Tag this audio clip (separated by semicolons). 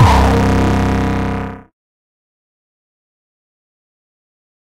drum; synth; electronic; pack; sample; electronica; sound; kit; modular; samples